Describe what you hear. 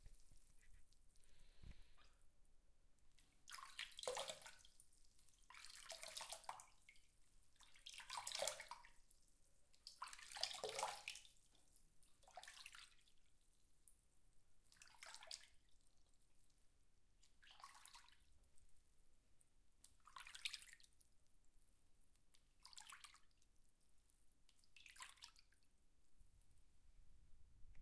Water gently being splashed in a sink.